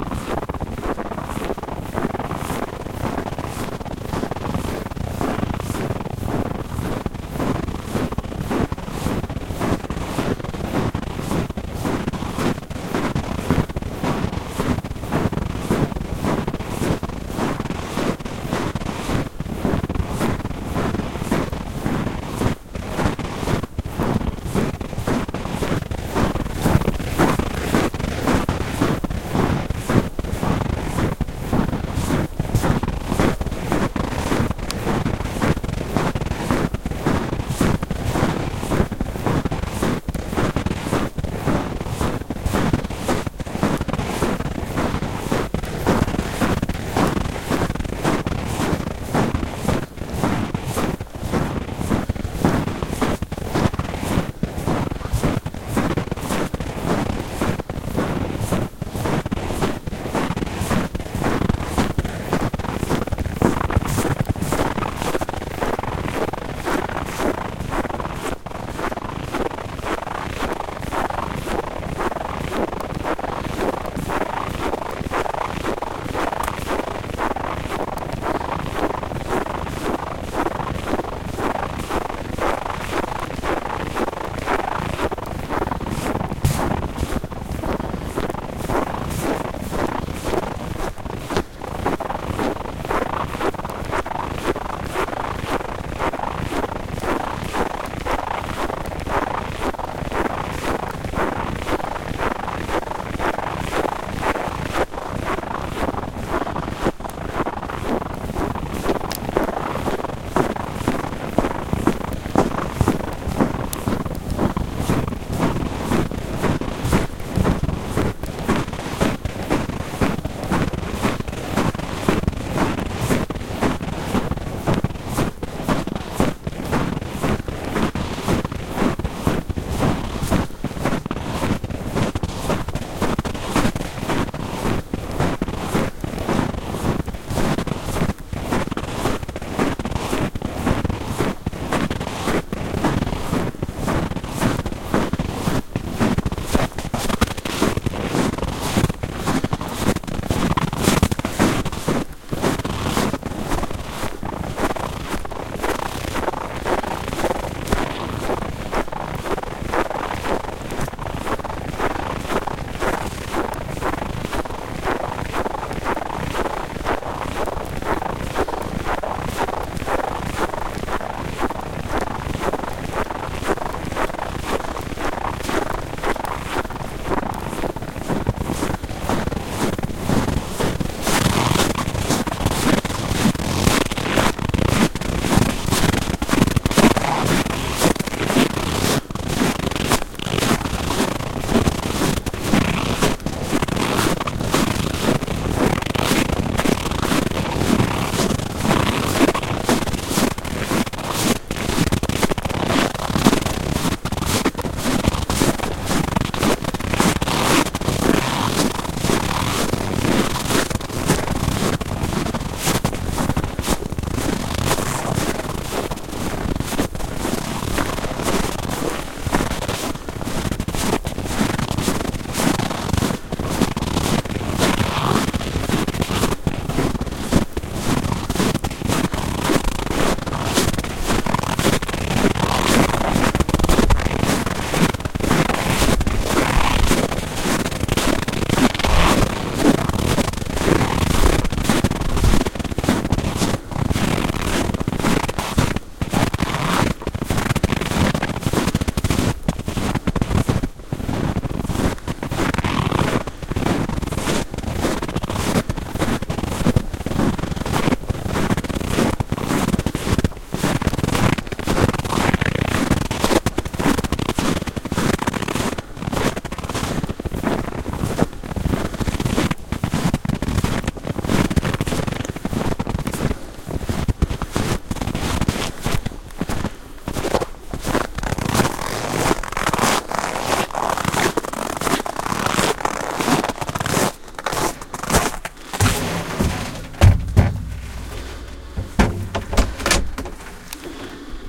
Walking in the snow. Quiet surroundings. Rather rhythmic.
rhythm, snow, winter, walking, dynamic